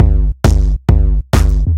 135 Hard Club Drums 01
hard club drums
free, loop, trance